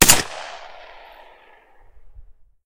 Firing Semiauto Rifle 2
Field recording of a rifle # 2.
Firearm, firing, FX, gun, rifle, shooting, shot, weapon